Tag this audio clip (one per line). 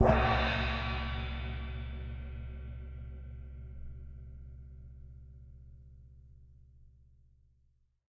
beat
bell
bowed
china
crash
cymbal
cymbals
drum
meinl
metal
one-shot
percussion
ride
sabian
sample
sound
special
splash
zildjian